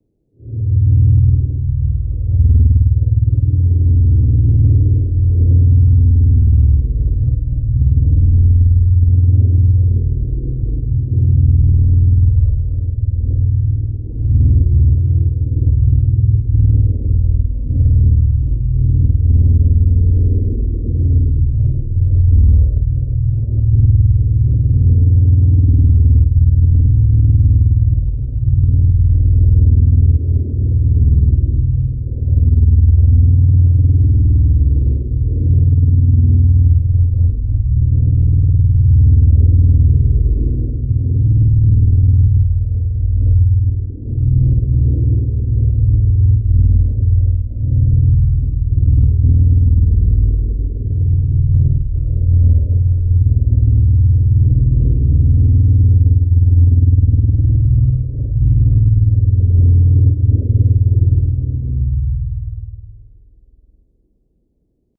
sound created from a single shot of metal, worked with plu on synthesizers and
background, engine, room, space, spaceship, synthesizing